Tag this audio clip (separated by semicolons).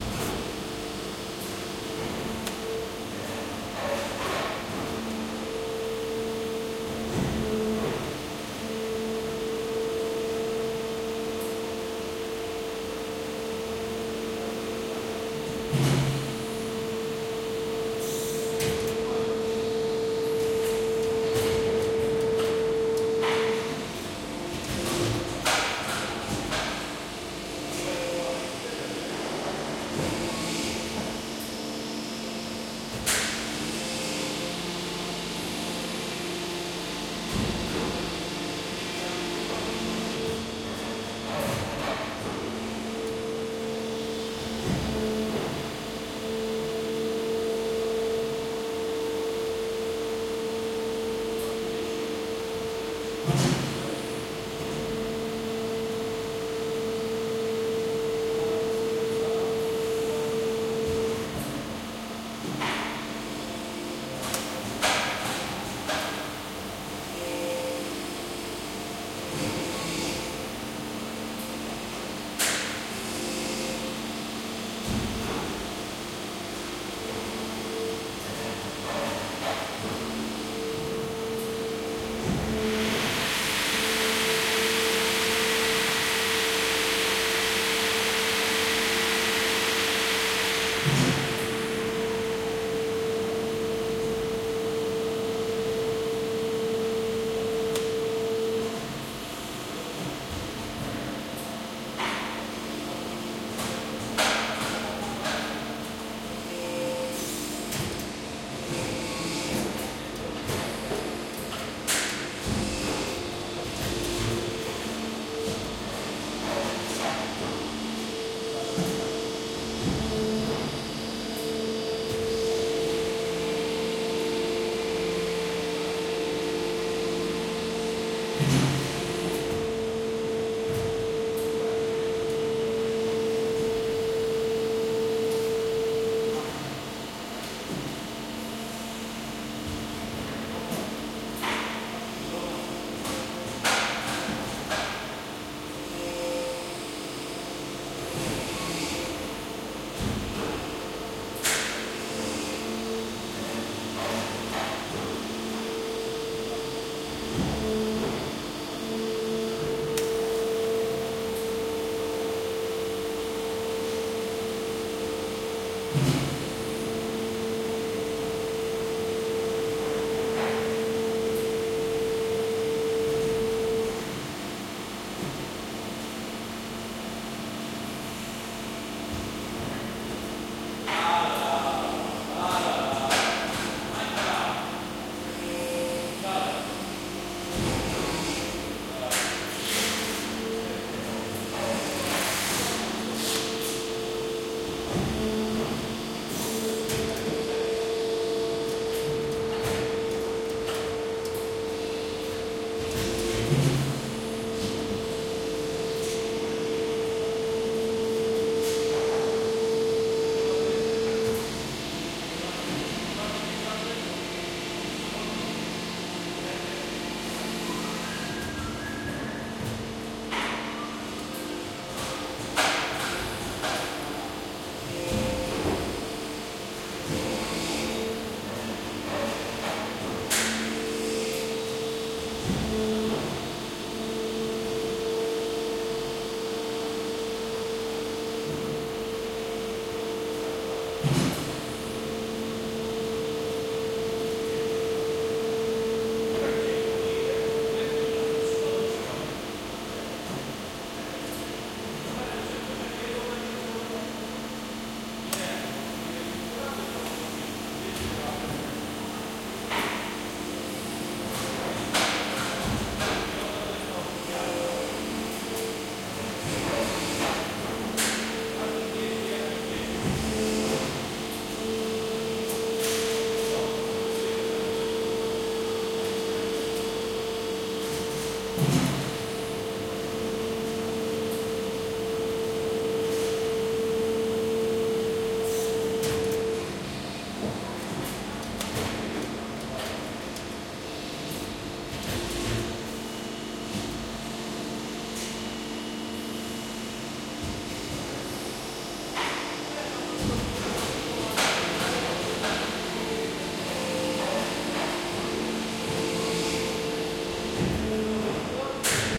industrial; processing; plastic